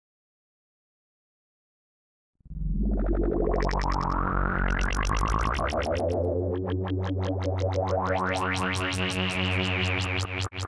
awesome synth fart i made with granuizing a bass sound
grain, bass, fart, granulated, granulized